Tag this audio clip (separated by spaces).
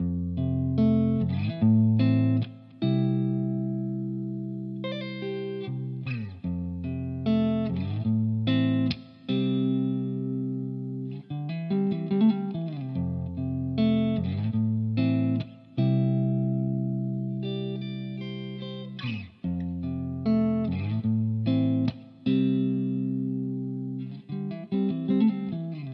electric hiphop smooth